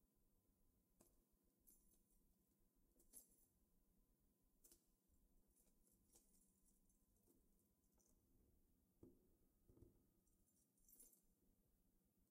Janitor's Keys
Recording of distant keys in a big hallway.